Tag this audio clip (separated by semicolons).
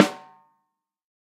14x8; artwood; custom; drum; multi; sample; shure; sm7b; snare; tama; velocity